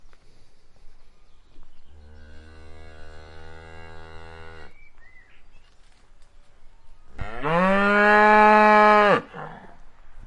Cow moo #2
A particularly loud moo, up-close and personal.
lowing, cow, countryside, mooing, farm, farm-animals, cattle, moo, cows